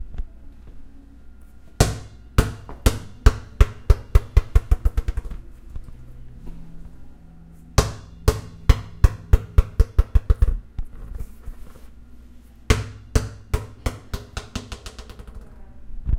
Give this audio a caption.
ball basketball drop
ball bounce basketball drop
drop, basketball, bounce, ball